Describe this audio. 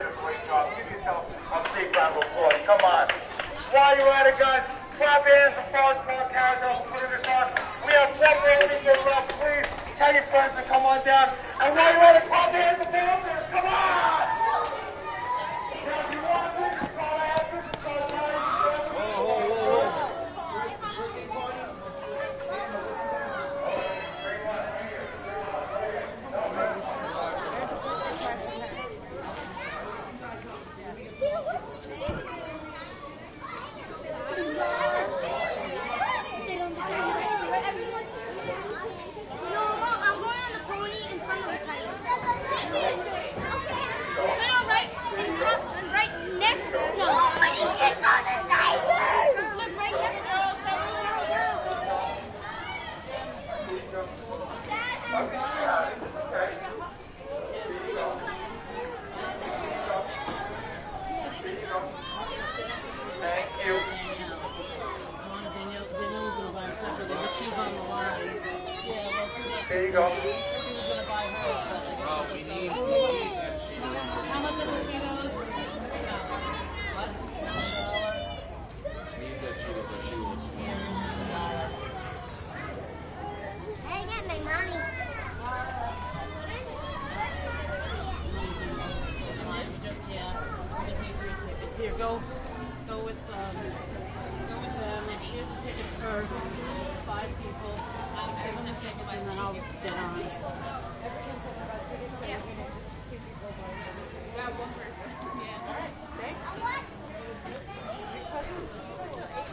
by the carousel in forest park, queens
Field recording by the carousel at Forest Park, Queens, New York City one summer afternoon. There was a birthday party with a rather loud, bull-horn-wielding clown.
carousel, clown, field-recording, forest-park, merry-go-round, queens